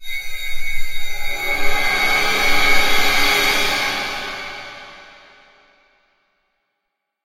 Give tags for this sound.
sunvox; disturbing

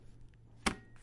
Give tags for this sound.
Stapler; table; office

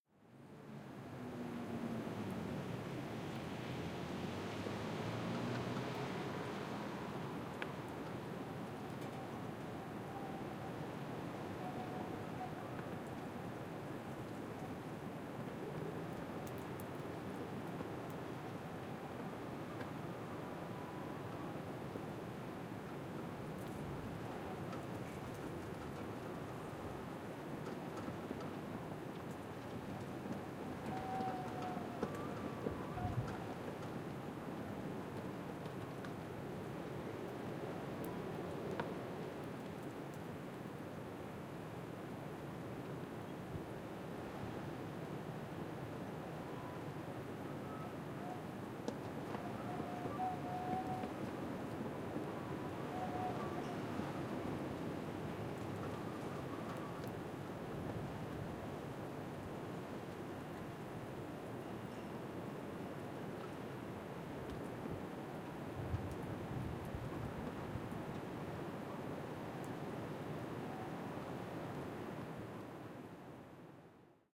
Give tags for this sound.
flagpole; birds; city; seagulls; field-recording

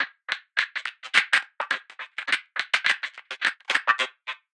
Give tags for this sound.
drum; fx; glitch; loop; percussion

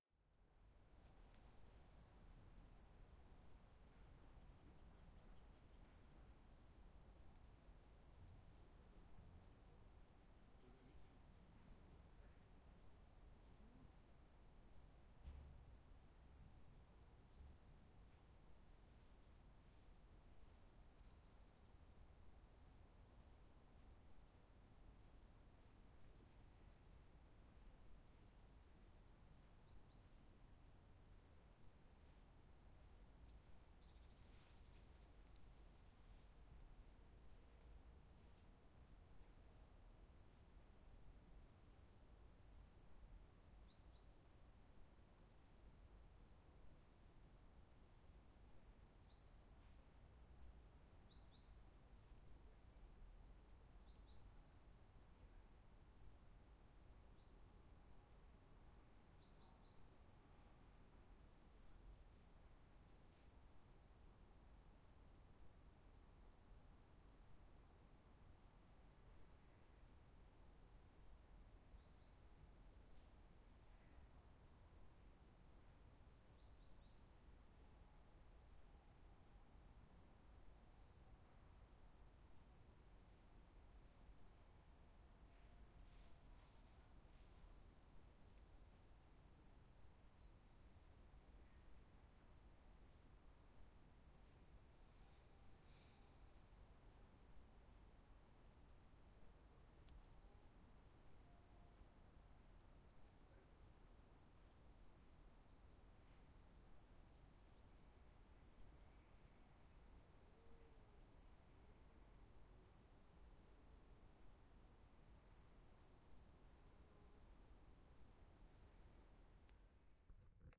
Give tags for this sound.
city; park

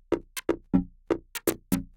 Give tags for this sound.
loop; minimal; synth; tech

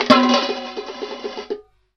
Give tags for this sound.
can; child; hit; percussion; play; roll

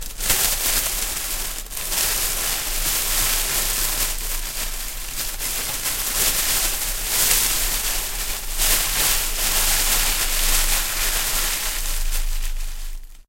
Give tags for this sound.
rap; scratch; bag; vinyl

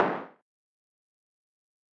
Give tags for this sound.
percussion; snare; snare-drum; synth1

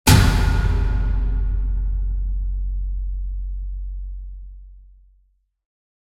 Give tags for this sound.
Hit; Impact; Metal

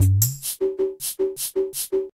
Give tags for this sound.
bongo; paper

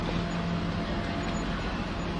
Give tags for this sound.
delaware; ocean; boat; bay; cape-may-lewes-ferry; field-recording; new-jersey

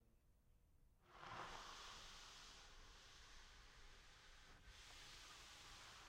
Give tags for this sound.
house; room; door

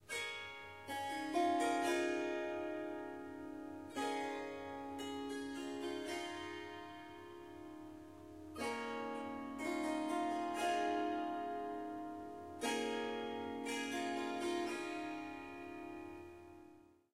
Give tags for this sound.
Surmandal; Strings; Swarsangam; Ethnic; Melodic; Riff; Melody; Indian; Harp; Swar-sangam; Swarmandal

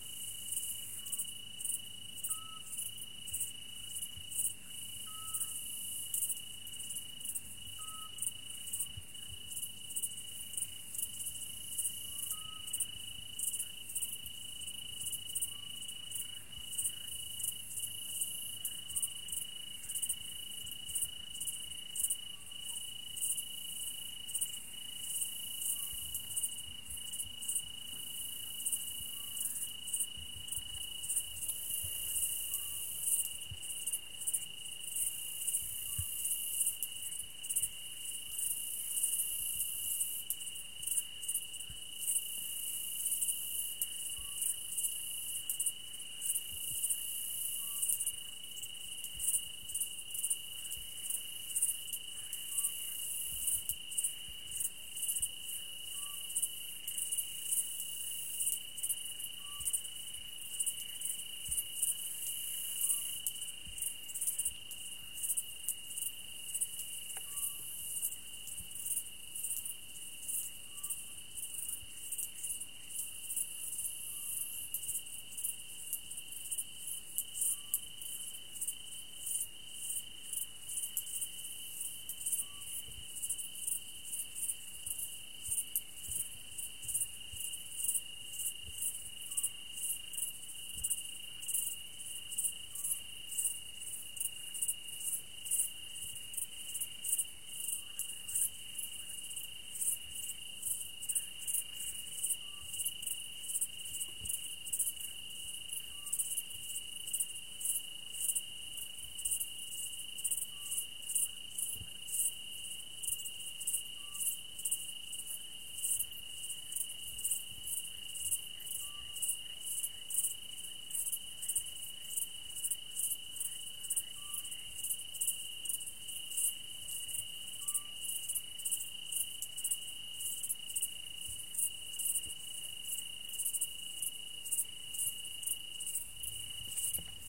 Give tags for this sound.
Ambience; countryside; field-recording; nature; night

